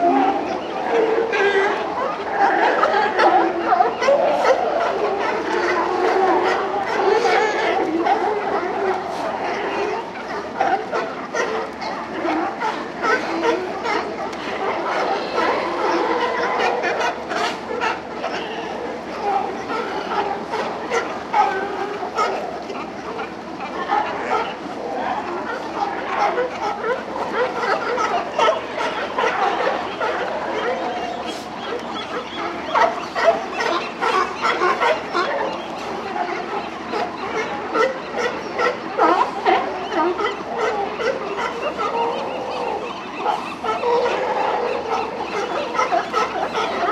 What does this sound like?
sealspier39sf2009st
A stereo recording of the many sealions congregating at Pier 39 (San Francisco) in 2009. In 2010 most have left, believed to have gone north for food.
animals, california, mammals, nature, northern-california, pier, pier-39, san-francisco, sealions, sf-bay-area